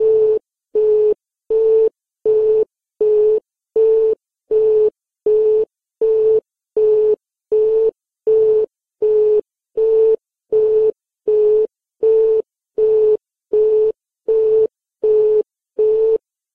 An alarm sound effect produced from applying the DFX Skidder VST effect onto a very, very slowed down recording of me whistling. It's ended up sounding uncannily like another alarm sound effect from Gerry Anderson's "Thunderbirds" (played whenever you see those portraits with the eyes that light up).